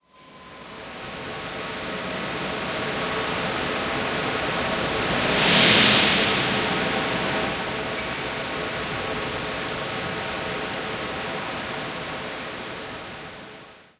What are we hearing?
steel factory002
Unprocessed stereo recording in a steel factory.
industrial, noise